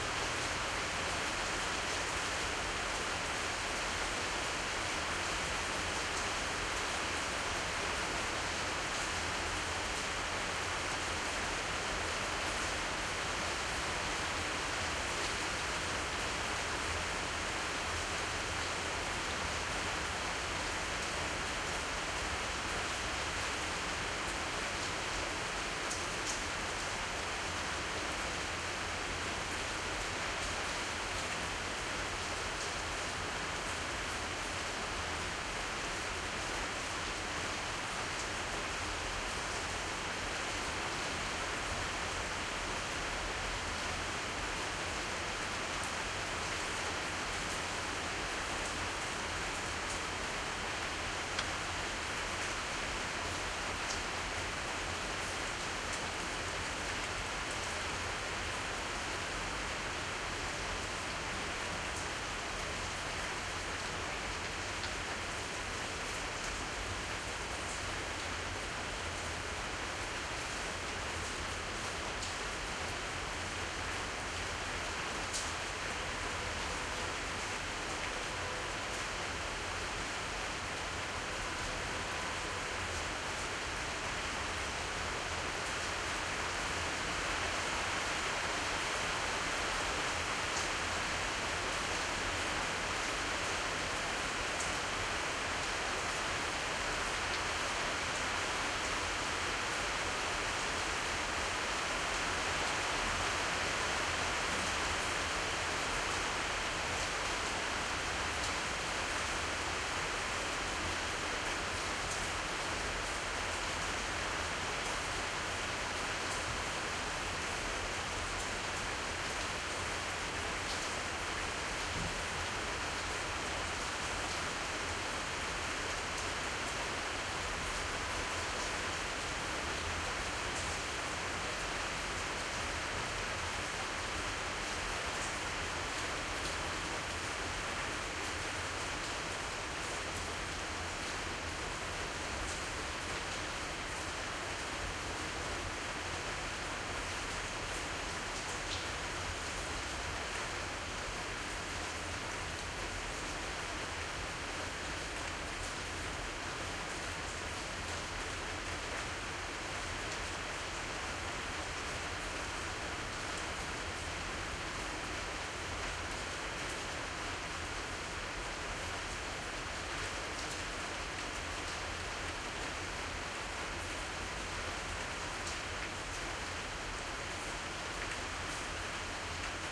rainfall in early autumn 2012 in Barcelona rain subsides naturally at the end of the recording
towards-inner-court, outdoor-recording, rainfall, SonyHXR-NX5